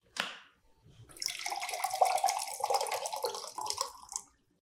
AGUA NO COPO
glass, liquid, water